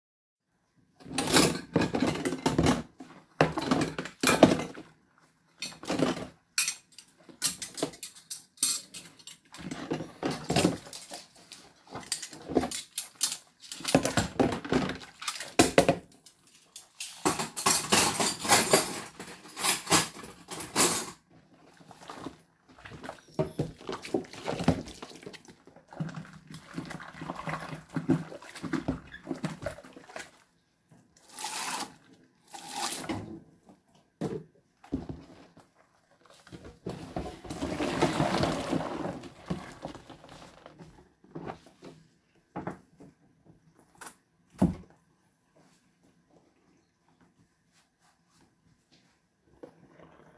Washing up, rinsing, draining.